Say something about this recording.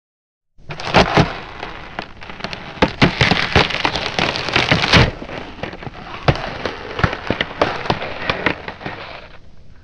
Ice 4 - Slow
Ice 10 - Slow
Derived From a Wildtrack whilst recording some ambiences
crack, field-recording, freeze, frost, snow, step, walk